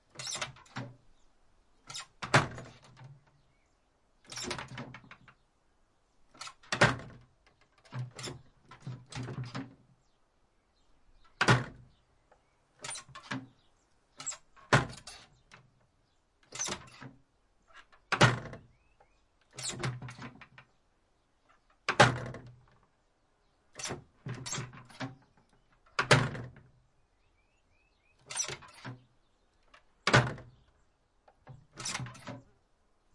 wood shutter outer door with antique handle slam closed deadbolt hit rattle and open squeaky knob various on offmic